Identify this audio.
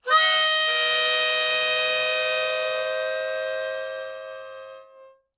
Harmonica recorded in mono with my AKG C214 on my stair case for that oakey timbre.
key, c, harmonica